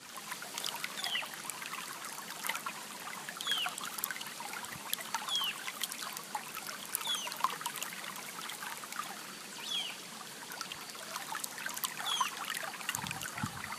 A recording from my iPhone of a River in the Cordoba´s Sierras in Argentina, you can hear some birds to on the background.
Grabación realizada con mi Iphone de un rio de las Sierras en Argentina, se pueden escuchar algunos pájaros en el fondo.
cordoba, river, rio, outdoors, nature, ambient, birds, pajaros, stream, naturaleza